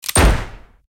Gunshot pitch B
Created at studio with many layers, sound of a semi-auto gun like Veretta, Glock etc, with trigger mechanism and a bit higher pitch.